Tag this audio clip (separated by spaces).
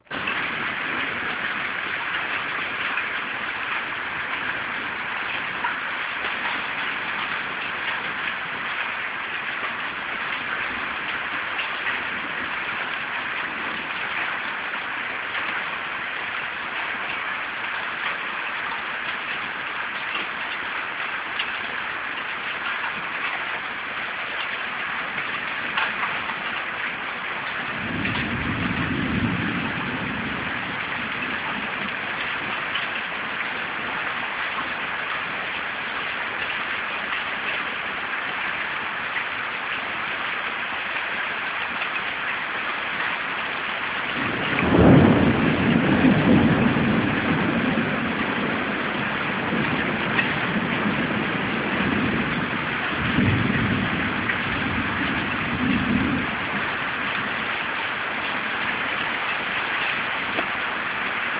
rain Turin